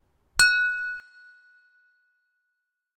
F#5note (Glass)
Water glass struck by chopstick. Notes were created by adding and subtracting water. Recorded on Avatone CV-12 into Garageband; compression, EQ and reverb added.